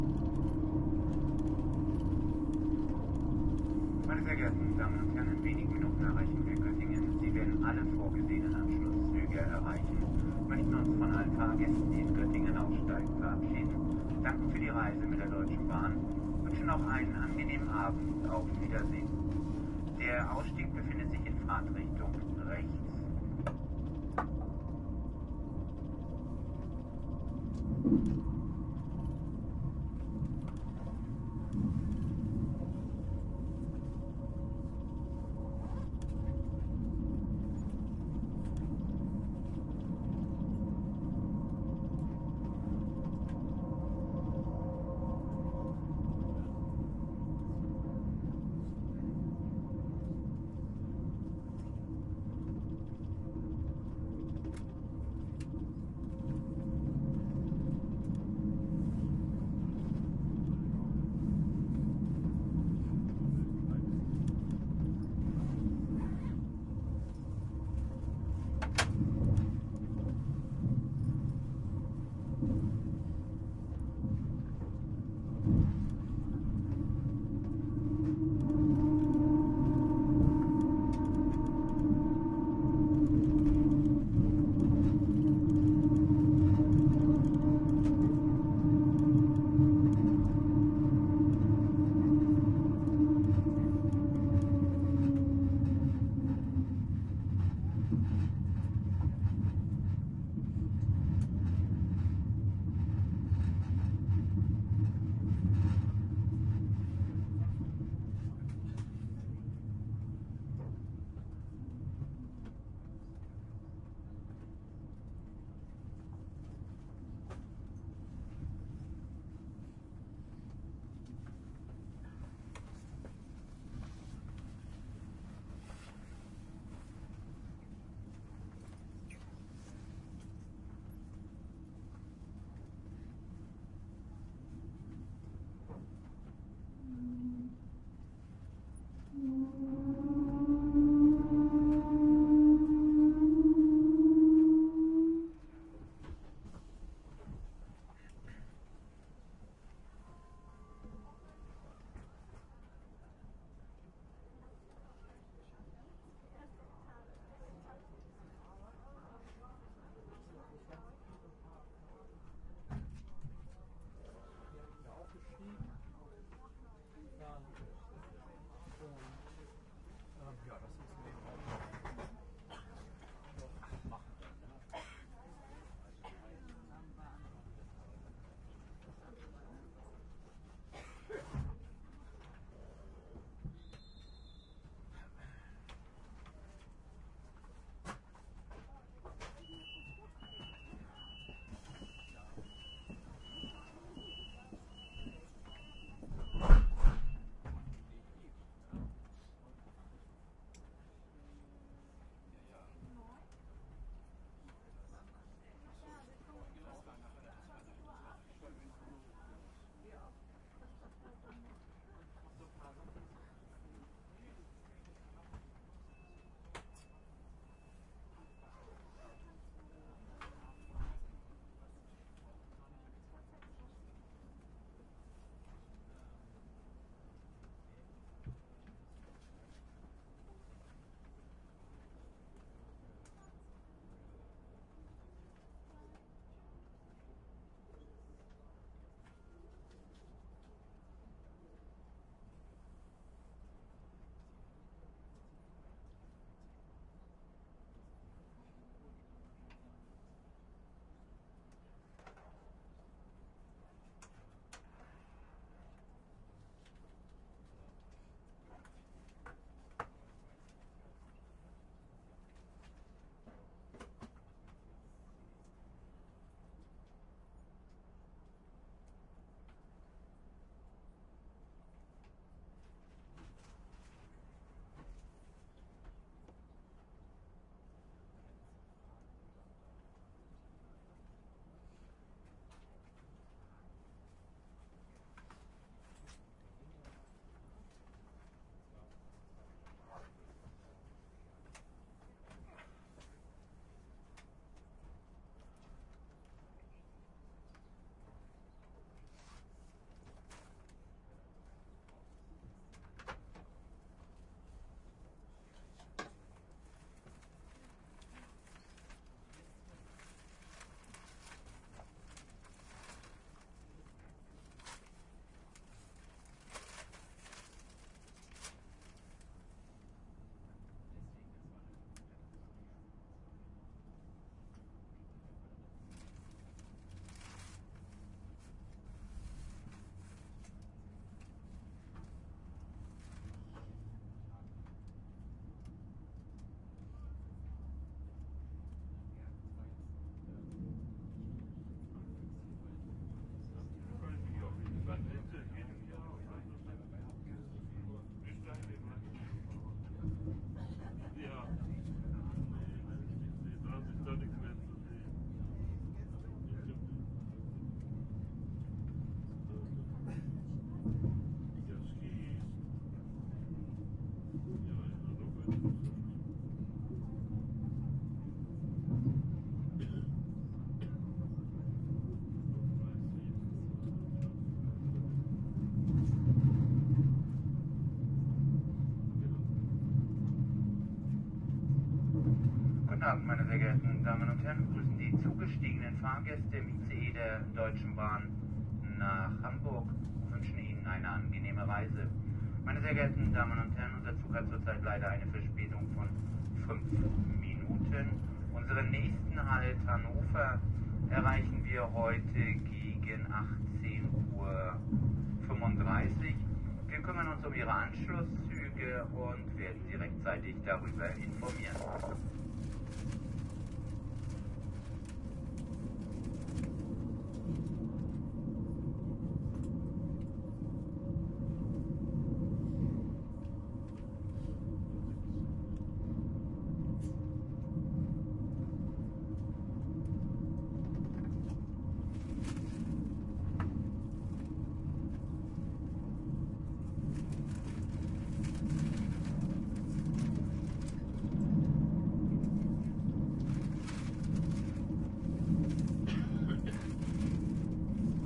stop on a german fasttrain
Recording within a fasttrain in Germany, approaching Goettingen station. Inside mics of the PCM-D50.